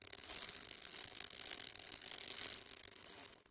Queneau Frot 03
prise de son de regle qui frotte
steel, clang, cycle, metal, metallic, piezo, frottement, rattle